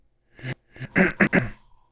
The sound of me, clearing my throat.
Recorded with a TSM PR1 portable digital recorder, with external stereo microphones. Edited in Audacity.
cold, clearing, flu